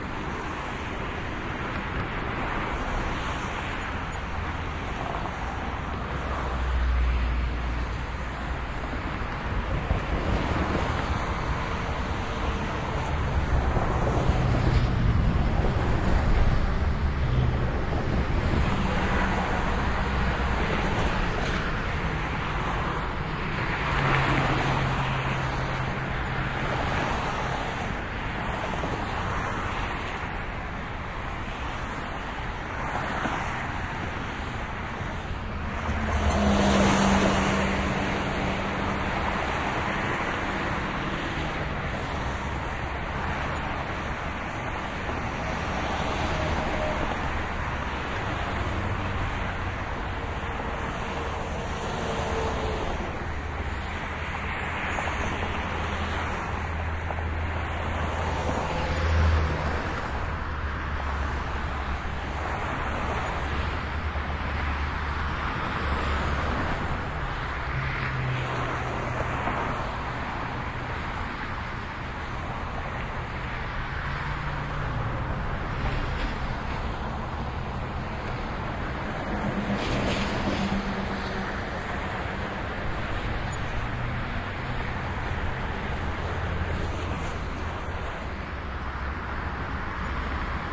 Standing on a bridge over I5 while lots of traffic drives by.Recorded with The Sound Professionals binaural mics into Zoom H4.
auto, cars, engine, geotagged, highway, noise, road, speed, street, traffic, trucks